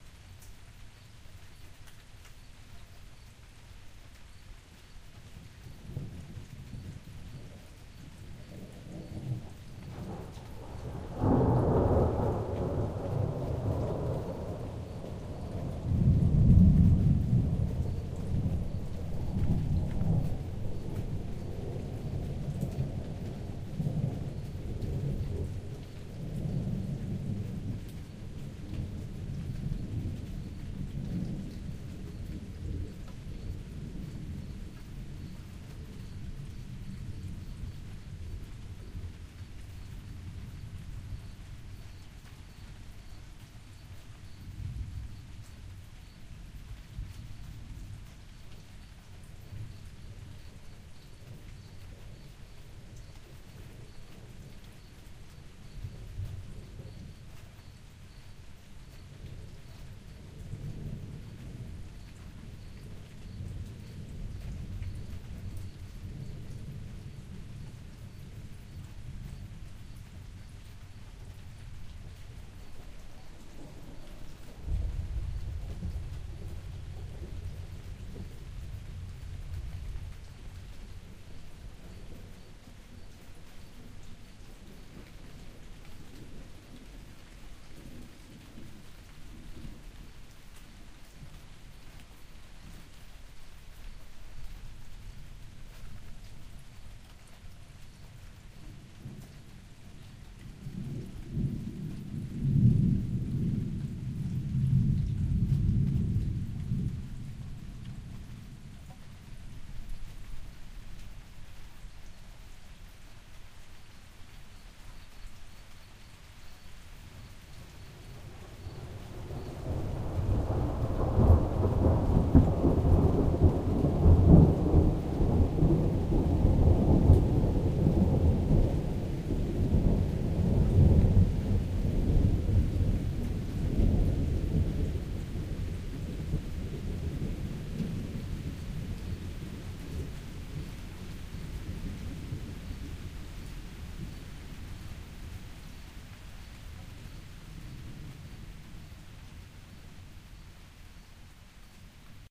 AMBIENT LOOP - Perfect Spectacular Hi-Quality Rain + Thunderstorm 002
A medium length seamless loop of thunder and lightning that crackles, booms and rumbles. Quite spectacular, very heavy bass and extremely high quality audio. Recorded with a H4 Handy Recorder.
thunder, rainfall, thunderstorm, weather, field-recording, outdoors, shower, perfect, bass, nature, rumble, clear, clean, rain, sprinkle, spectacular, deep, rolling, loop, loud